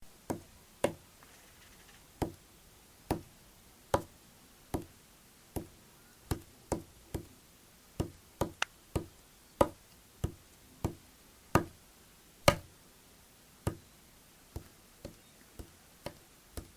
Tapping, Wall, A
Several taps and hits with a finger on a wall.
An example of how you might credit is by putting this in the description/credits: